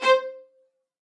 One-shot from Versilian Studios Chamber Orchestra 2: Community Edition sampling project.
Instrument family: Strings
Instrument: Viola Section
Articulation: spiccato
Note: C5
Midi note: 72
Midi velocity (center): 95
Microphone: 2x Rode NT1-A spaced pair, sE2200aII close
Performer: Brendan Klippel, Jenny Frantz, Dan Lay, Gerson Martinez
c5, midi-note-72, midi-velocity-95, multisample, single-note, spiccato, strings, viola, viola-section, vsco-2